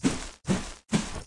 not loud sound of noise of clothing when throwing something
swing, grenade